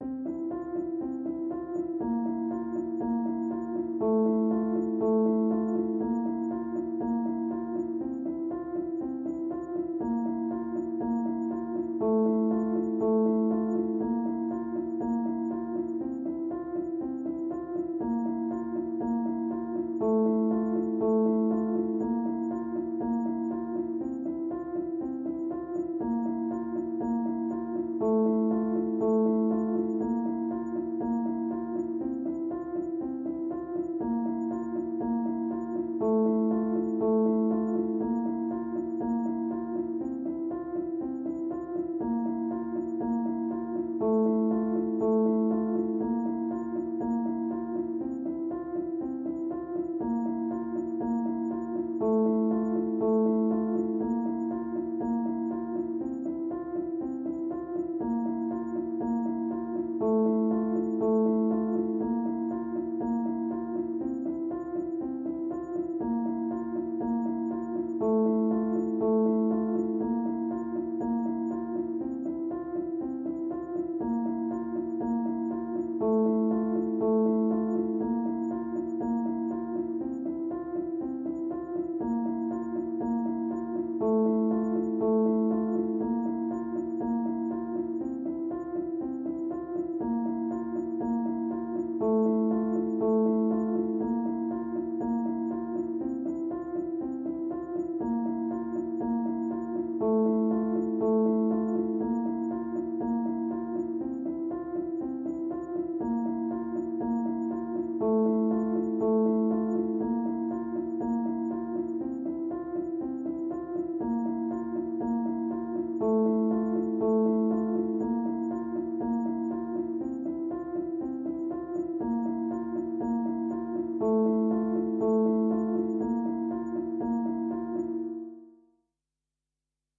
Piano loops 076 octave down long loop 120 bpm
120, 120bpm, bpm, free, loop, music, Piano, pianomusic, reverb, samples, simple, simplesamples